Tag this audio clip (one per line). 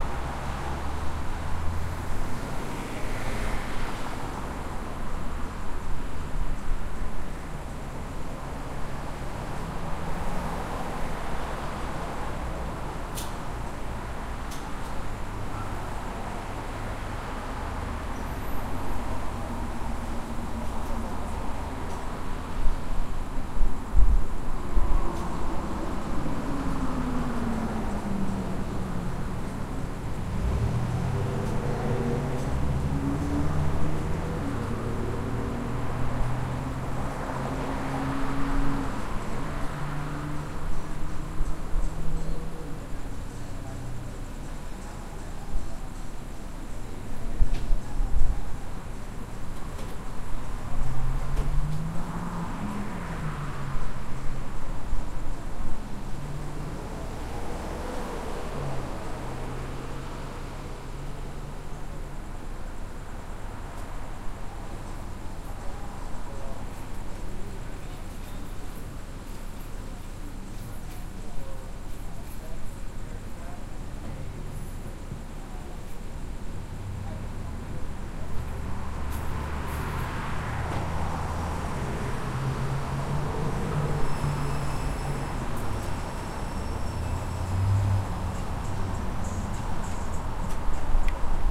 gas
time
gas-station
traffic
station
summer
los-angeles
night